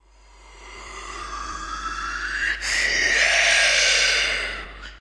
Recording of me "growling" that has been highly altered to sound like a demon snarling.